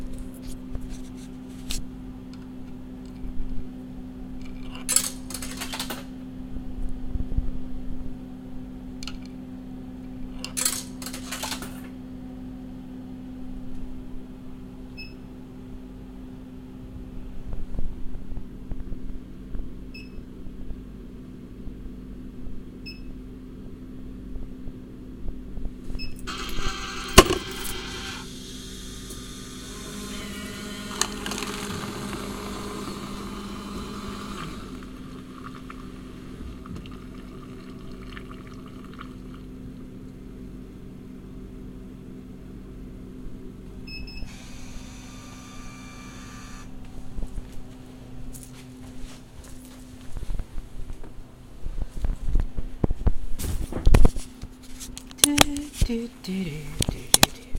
Vending Machine - hot drink
This is me getting a decaffeinated coffee from the vending machine at work, with the sound of two 5p pieces going into the coin feeder. This is the full take so ends with me singing o myself like an absolute goon.
beverage, change, coffee, coin, cup, dispense, dispensing, drink, drinks, feeder, hot, machine, paper, pennies, pour, pouring, soup, tea, Vending